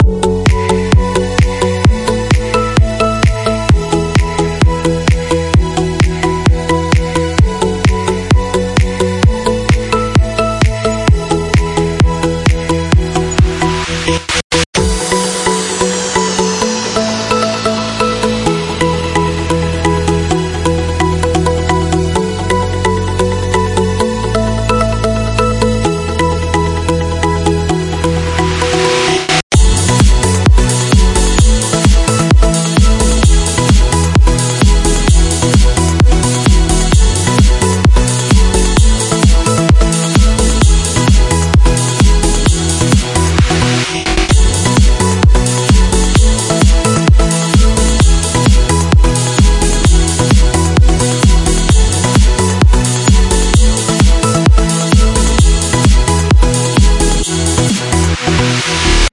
Background music 130bpm
This sound was created with layering and frequency processing.
BPM 130
130-BPM, Background, Club, Dance, EDM, Electronic, Loop, Melody, Music, Sample, Synthesizer, Trance